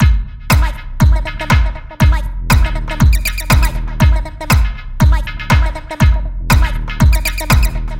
120bpm Loop P104
Processed acid-loop 120 bpm with drums and human voice